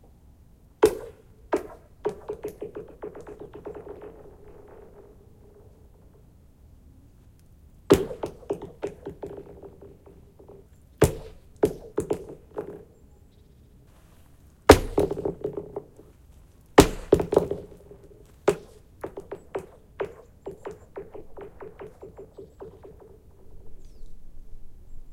Stone on frozen lake
several stones thrown on the surface of a small frozen lake
crack, hits, frozen-lake, rock, outdoor, cracking, field-recording, stone, bang